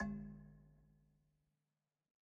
Metal Timbale 003
home, pack, kit, record, drum, trash, timbale, god